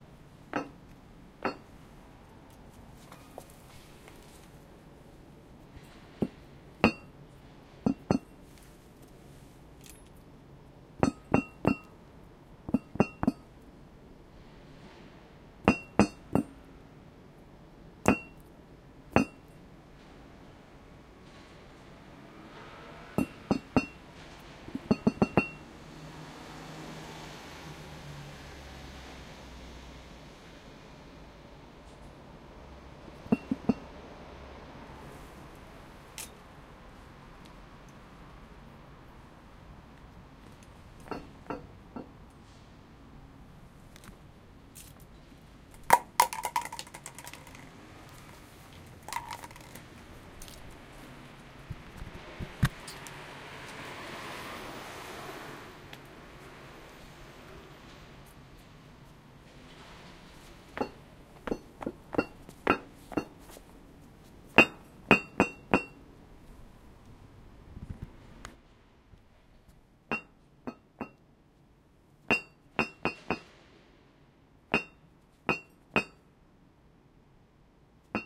In Matsudo, Japan. A small manhole cover / lid, appeared to be wonky and wiggling when stepped on, causing a short, heavy metallic sound. Around midnight.
Recorded with Zoom H2n in MS-Stereo.